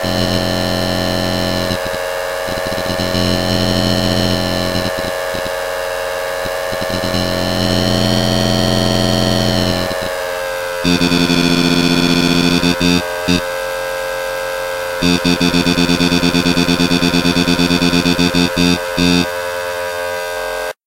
Same sort of settings on the monotron and effects, but this time I swept the frequency of the main monotron oscillator. At low frequencies the sound becomes unstable and breaks into a pulsating noise.
The headphones output from the monotron was fed into the mic input on my laptop soundcard. The sound was frequency split with the lower frequencies triggering a Tracker (free VST effect from mda @ smartelectronix, tuned as a suboscillator).
I think for this one also the higher frequencies were fed to Saro (a free VST amp sim by antti @ smartelectronix).